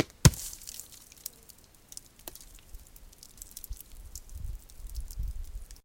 rock falls with cracking
rock falls, hits the ground, dry branches makes some cracking sound
dry, hit, cracks, rock